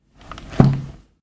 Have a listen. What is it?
Closing a 64 years old book, hard covered and filled with a very thin kind of paper.
lofi, loop, household, noise, book, percussive, paper